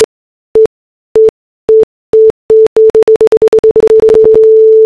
A beeping noise, with long pauses in between beeps at first, then shorter and shorter until a continuous beep... could be used like a timebomb i guess
time, beeping, timebomb, bomb, beep, beeps